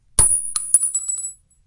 Shell .30-06 Winchester 02
A large pack with a nice variety of bullet shells landing on the ground. (Just for you action film people :D)
I would like to note, however, something went wrong acoustically when recording the big .30-06 shells dropping to the ground (I think my recorder was too close when they hit) and so they have some weird tones going on in there. Aside from that, the endings of those files are relatively usable. If anyone can explain to me what went on technically, I would appreciate that as well.
All shells were dropped onto clean concrete in a closed environment, as to maintain the best possible quality level. (I had film work in mind when creating these.)
bullet, ding, magazine, bullet-shell, shell, ching, round, bolt, dink, clink, eject, rifle, handgun